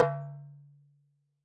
Metal Darbuka, recorded with Haun-Stereo-mics near the hole